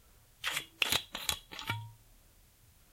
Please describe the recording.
opening a bottle with a turning cap.
bottle, open, turning, drink, twisting